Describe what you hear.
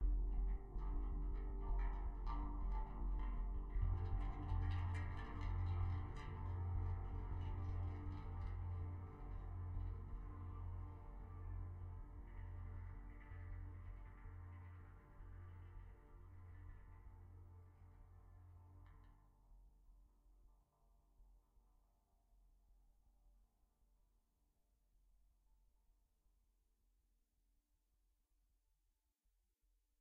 dog clang
lowercase minimalism quiet sounds
lowercase, minimalism, quiet